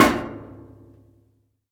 Appliance-Clothes Dryer-Door-Hit-04
The sound of an open clothes dryer door being hit with a finger.
This file has been normalized and background noise removed. No other processing has been done.
Hit, Clothes-Dryer, Metal, Dryer, Appliance, Boom, Door